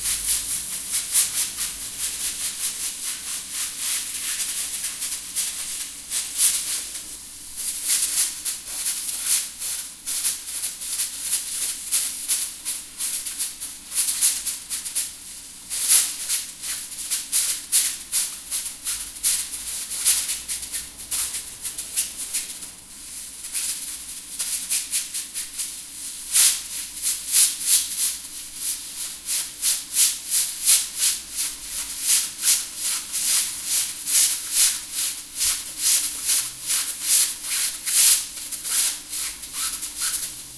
PressureCooker Variations
Pressure cooker with hot air and pressure release variations. Recorded with Zoom H4nsp.
air, cooker, hot, pressure, pressure-cooker, steam, variations